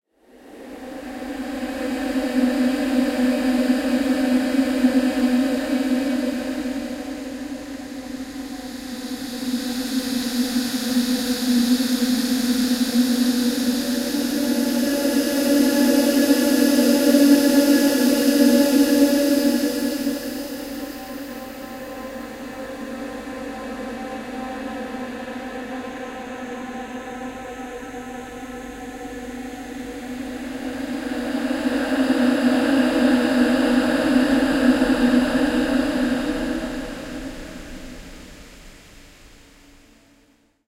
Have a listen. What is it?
An ethereal sound made by processing an acoustic sample.
scary, synthetic-atmospheres, blurred, atmospheric, spookey